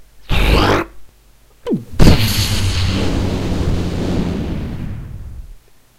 Energy explosion 1
An energy effect inspired by anime Fate/Zero or Fate/Stay Night series.
explosion, impact